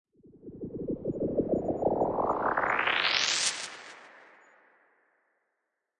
Created with pink noise, sweeping phaser and stretching effect. The phaser in cool edit has funny preset called bubbles which gives unique sound to this effect.